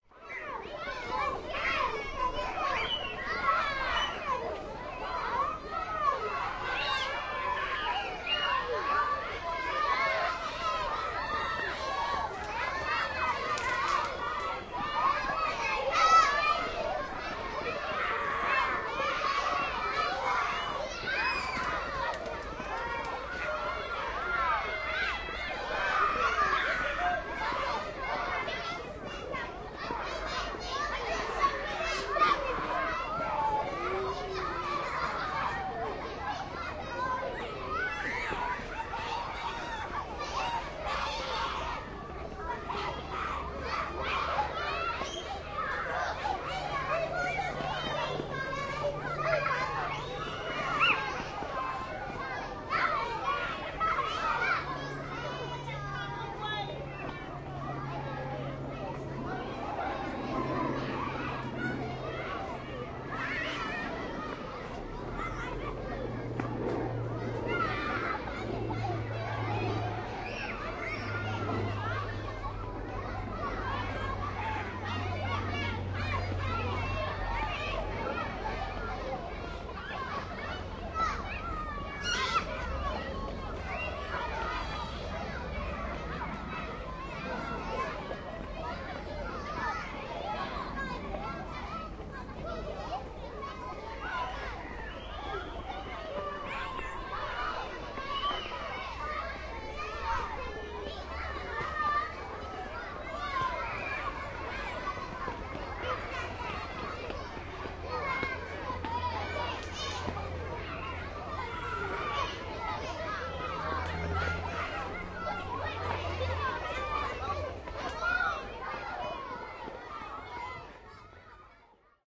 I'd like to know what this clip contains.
Children's Playground
playing, screaming, kid, kids, school-yard, playground, child, kindergarten, play, shouting, children